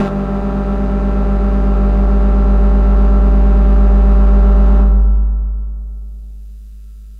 Deep detuned analog synth bass
From a Minimoog
analog, synth, moog, minimoog, agressive, synthetizer, deep, bass